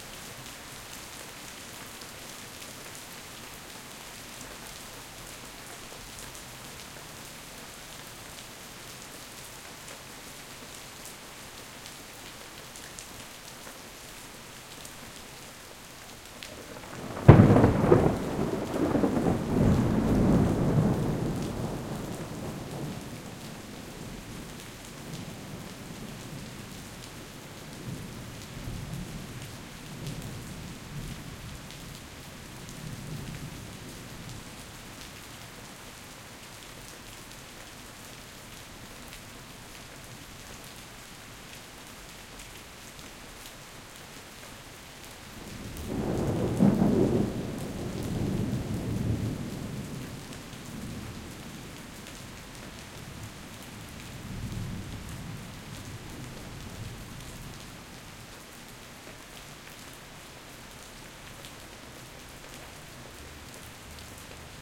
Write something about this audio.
Thunder during a tropical storm. Recorded in Kuala Lumpur, Malaysia, with a Zoom H6.